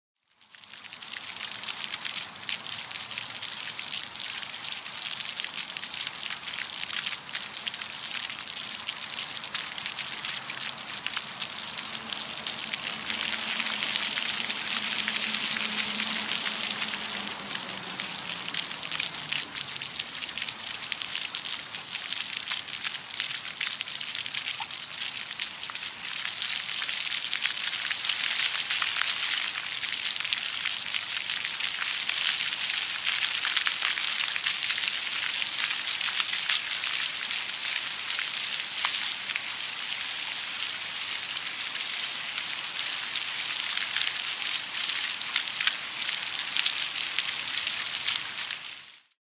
This is a sound recorded during July, 2011 in Portland Oregon.
oregon, pdx, fountains, soundscape, portland, sounds, sound, city